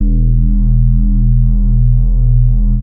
I've synthesized this sub bass tone with Ableton Operator synth. I think it has cool "howling" overtones and "reesy" detuned movement. After Operator it was processed with good multiband distortion & I've added a bit of stereo to the highest spectrum band.